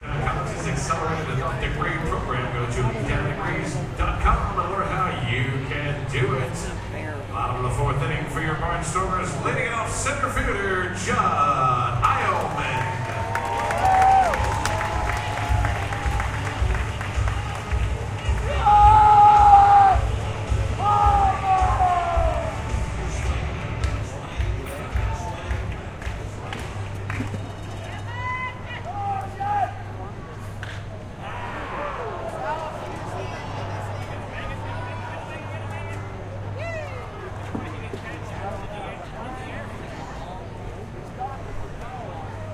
A home team player comes up to bat. He is announced, and a fan yells out his name. He connects with a pitch but hits it foul.